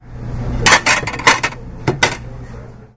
Coho - Clanking Plates
This is the sound of someone placing a plate in the dirty dishes bin at the Coho in Stanford. I recorded this sound with a Roland Edirol right next to the dirty dishes bin.
coho, plates, dishes, stanford